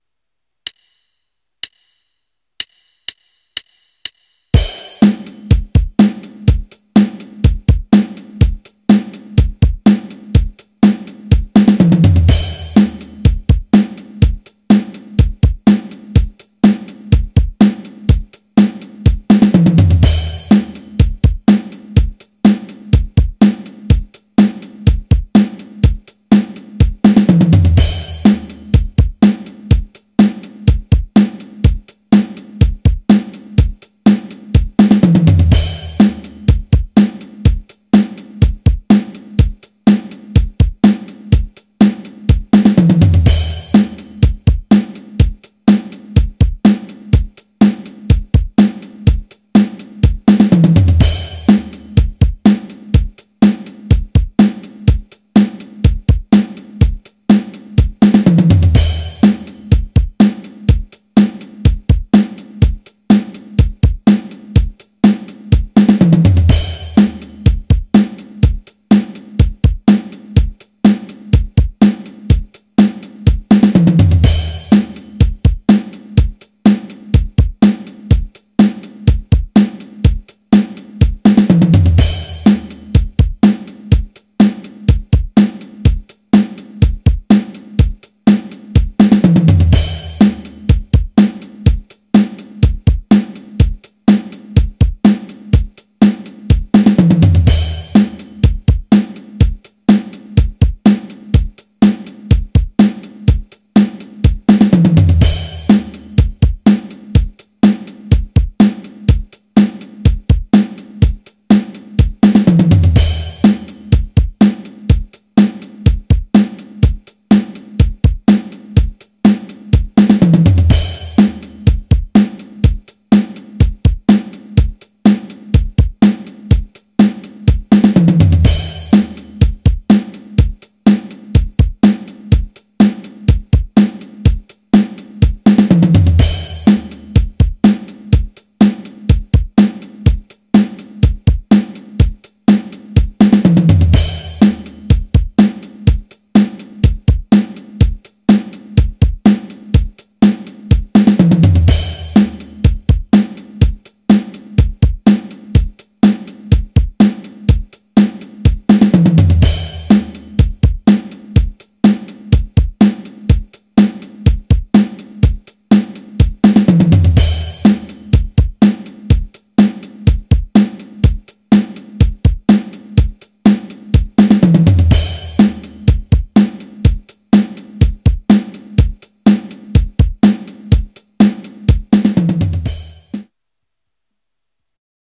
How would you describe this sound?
yama rock1
Rock beat recorded digitally from Yamaha drum pad.
digital, drum, percussion, drums